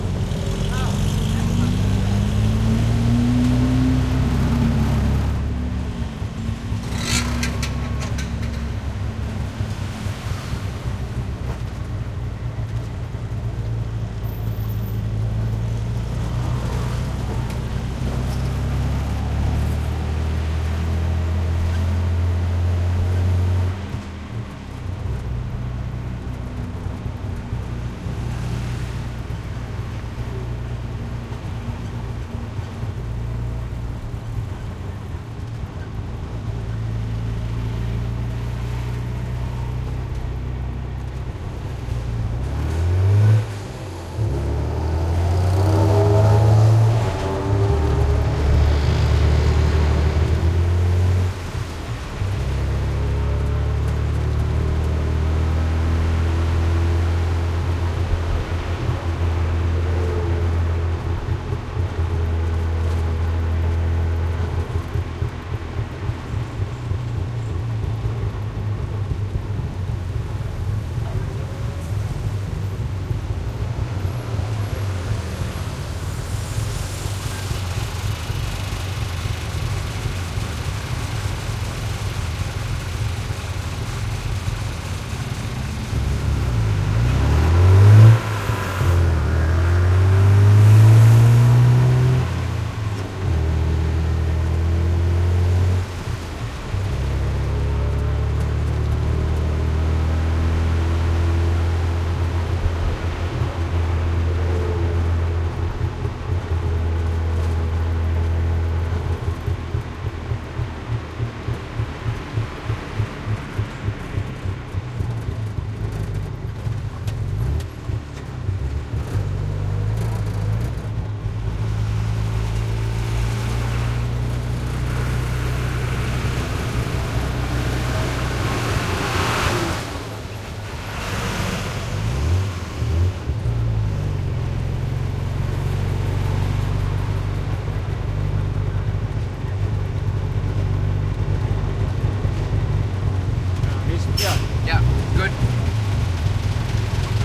Thailand tuk tuk motorcycle taxi on board various speeds, slow down and accelerate
exhaust, on-board, field-recording, motorcycle, various, tuk, Thailand, taxi